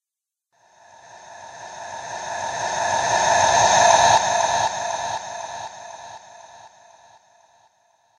A death breath I made using my own recording of my breath into an app on my phone and tweaking and reversing it in Audacity. Hope you enjoy!!!!!!!!
breath, Death, dying, horror, macabre, reverse, sad